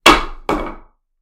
This is the sound of a piece of a wooden table hitting a different wooden table.
You get what you pay for...